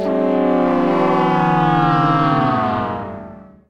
Processed versions of female voice sounds from "phone" sample pack mangled beyond recognition. Processed in Cool Edit, voyetra record producer and advanced audio editor. Lost track of steps in mangle process.
voice, processed, female, extreme